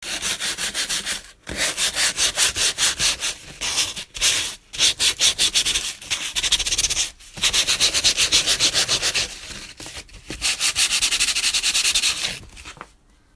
sandpapering wooden surface
scraping, work, wood, sandpaper, woodwork